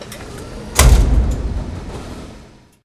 machine door
Automatic door of a machine that accepts empty bottles and redeems the deposit. Recorded with a Sharp minidisk and Sennheiser ME-102 mic capsules.